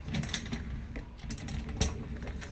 Study chair being moved
chair, record, sliding, sound